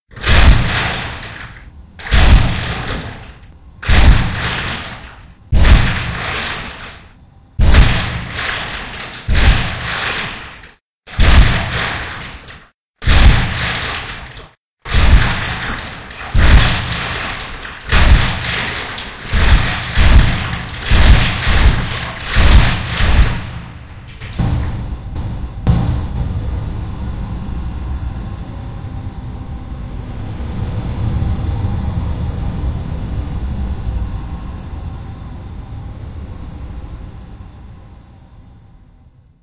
Footsteps of the Beast
The sound of a huge creature stomping their feet, or walking outdoors.
Monster, Footsteps, Beast